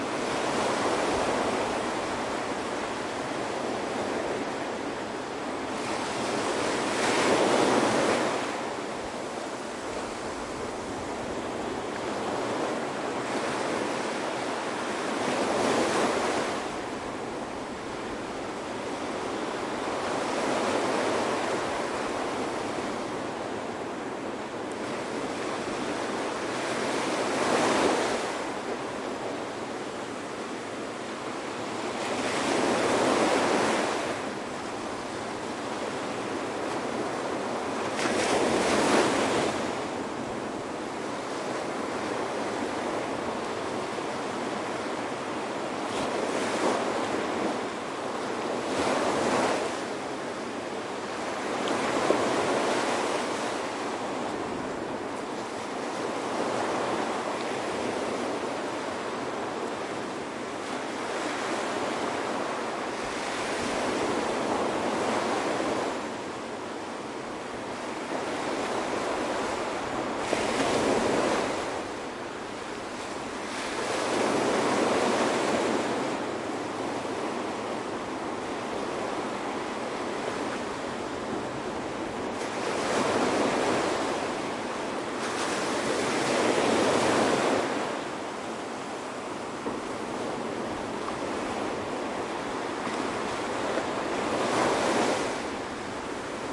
waves beach medium nearby